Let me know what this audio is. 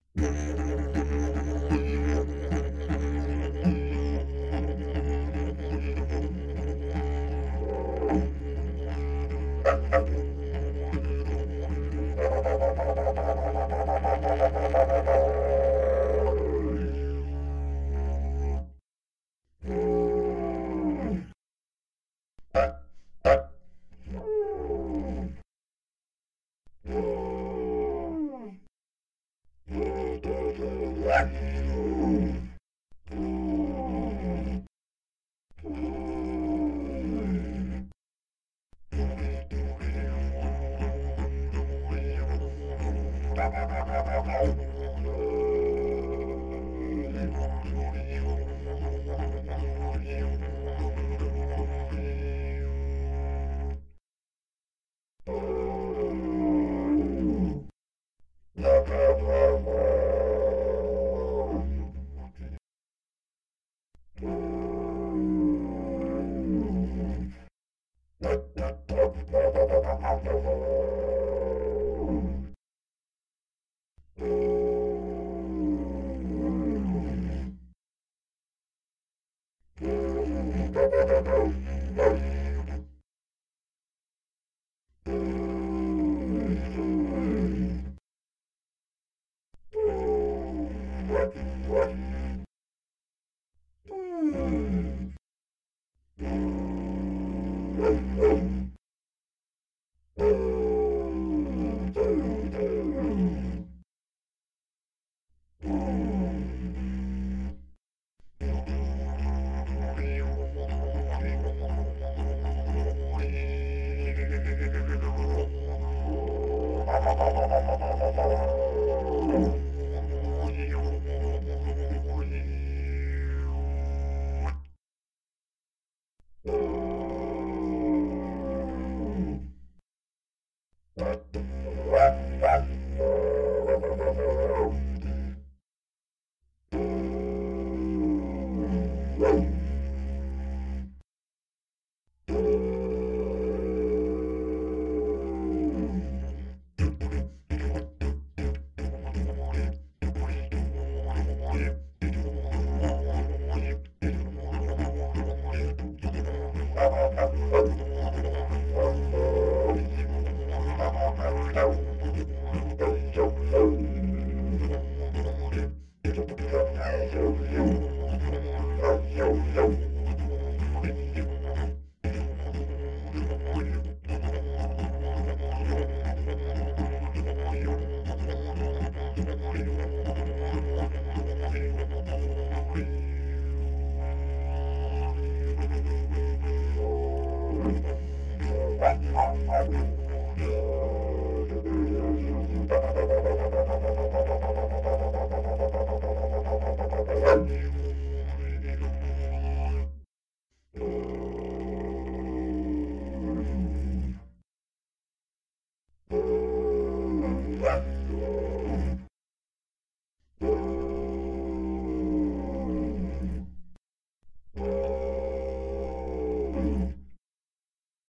didg 4 sample
didgeridoo, australian, didjeridoo